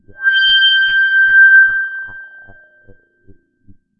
Multisamples created with subsynth. Eerie horror film sound in middle and higher registers.
evil, horror, multisample, subtractive, synthesis